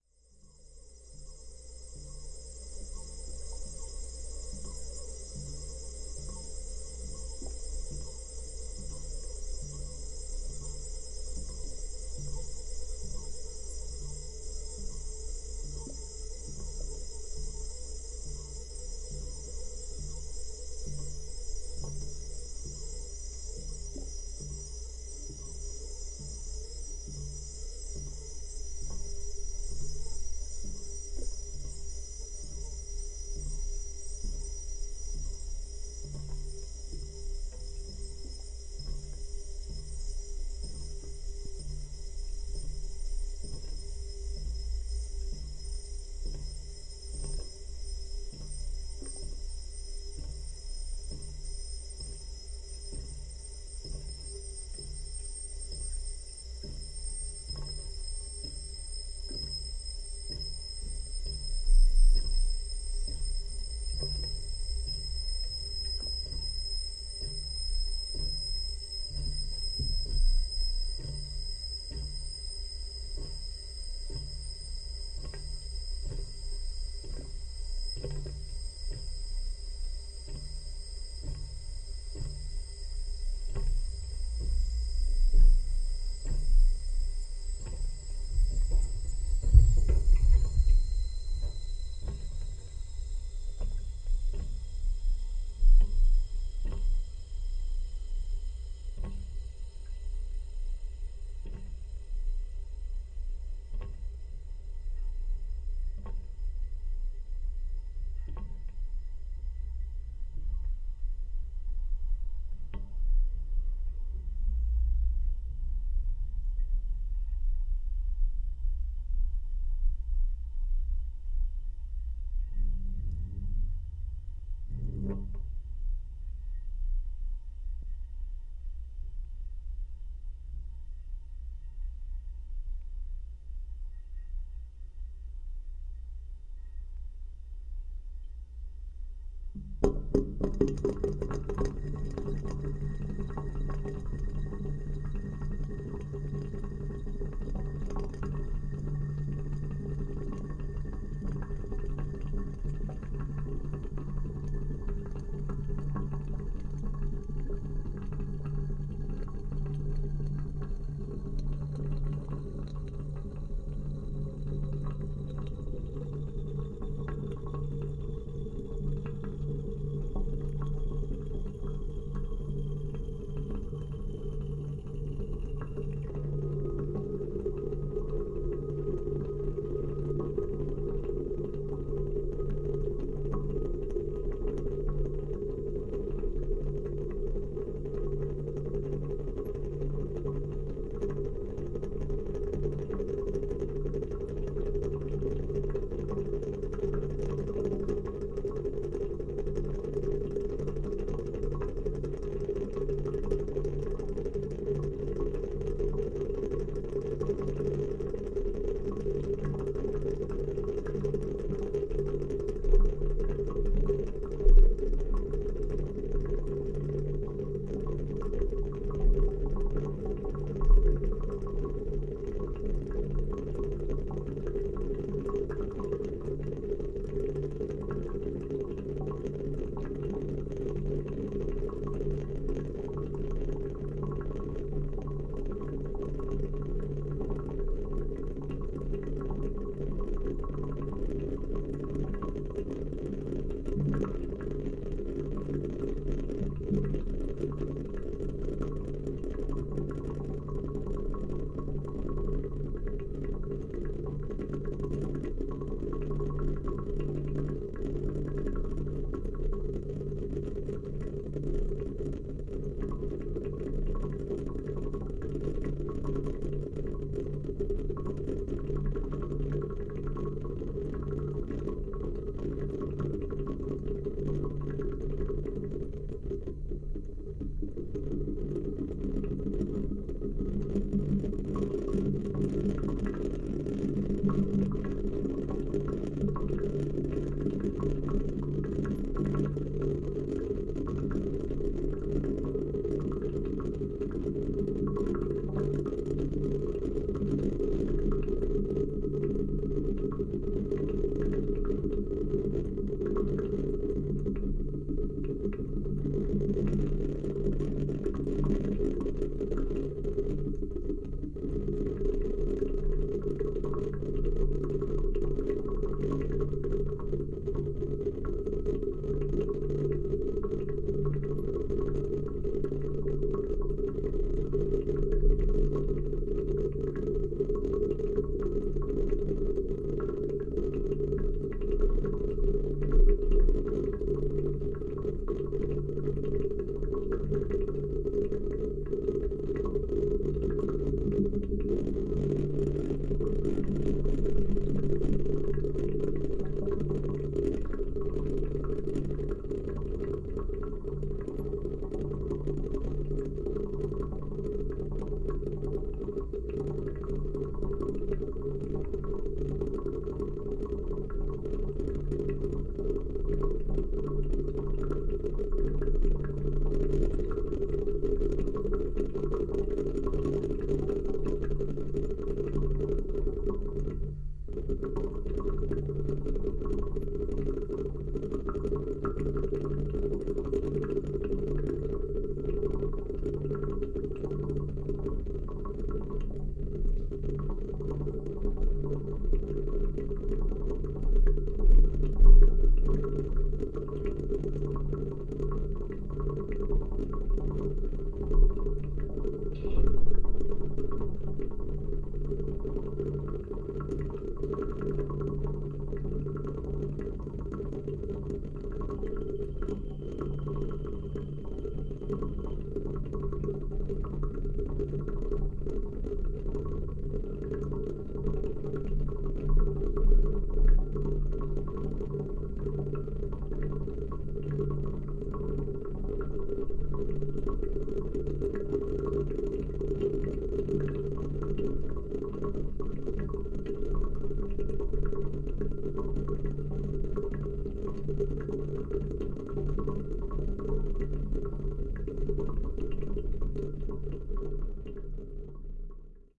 Sounds from my large radiator in my apartment. First the radiator is on, then I turn it off and everything gets quiet. Then the gurgling starts. Recorded with a Cold Gold contact mic into Zoom H4.